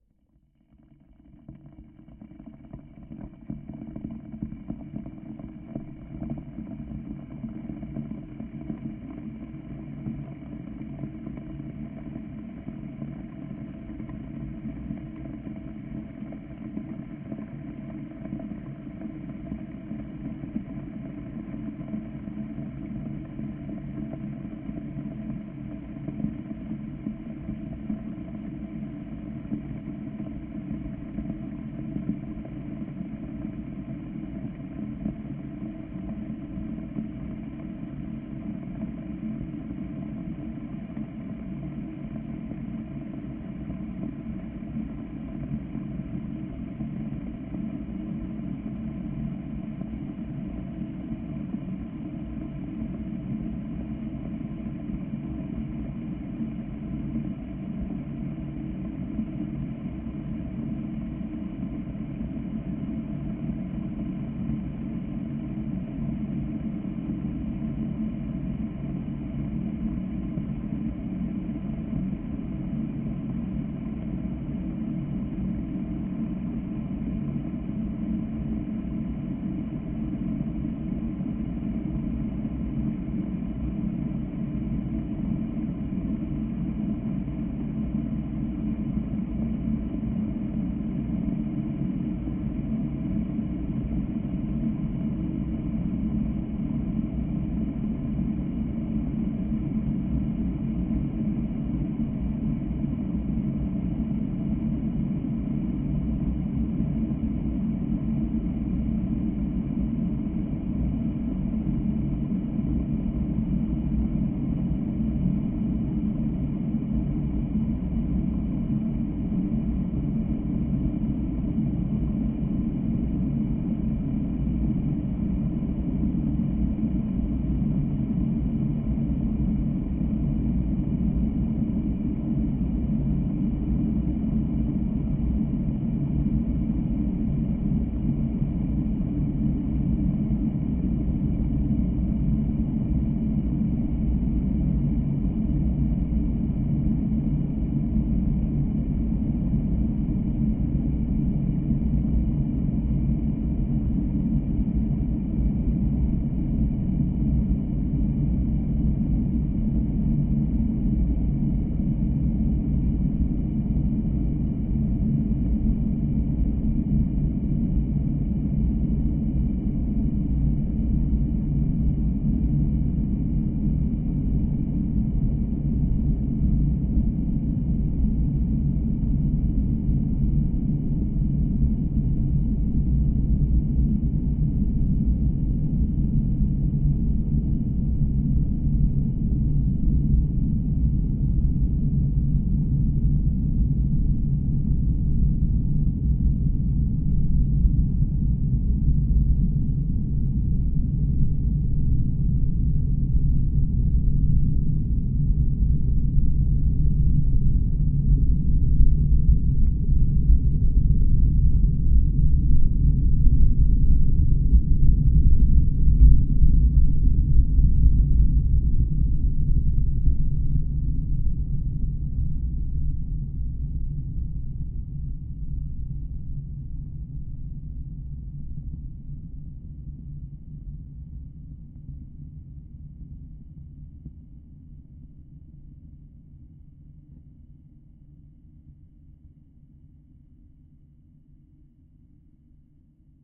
Kettle Boiling Contact Mic Recording 2 (Geofon)
Recording of a kettle boiling. Recorded with a LOM Geofon contact microphone into a Sony PCM-A10.
PCM-A10, ambience, ambient, appliances, boil, boiling, bubbles, contact-mic, contact-mircorphone, geofon, hot, lom, recording, sony, water